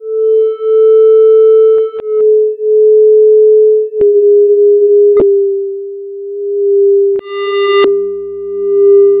to 400 to 440 hertz with various effects (wahwah, phaser)